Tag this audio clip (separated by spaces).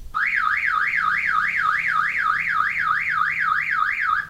alert car